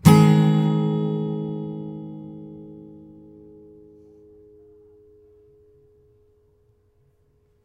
Ab ouch
The next series of acoustic guitar chords recorded with B1 mic through UB802 mixer no processing into cool edit 96. File name indicates chord played.
a, acoustic, flat, clean, guitar, chord